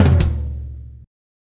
tom roll 2
From the drumkit used on the Black Bottle Riot album "Soul In Exile".
Played by Pieter Hendriks, Recorded by Sven Lens.